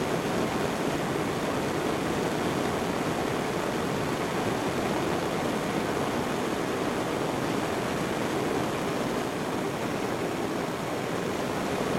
Foley Object Flame Thrower Loop Stereo
Loop sound of a Flame Thrower.
Gear : Zoom H5